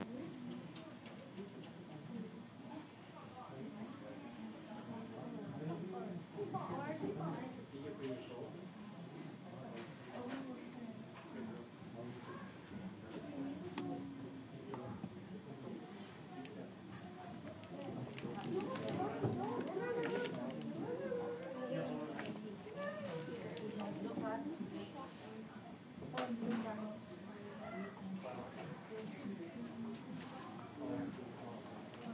Library Ambience
An audio of a library environment ambience with mild speaking and noises. Taken at the Santa Clara City Library: Central Park.
Recorded with iPhone 4S bottom microphone.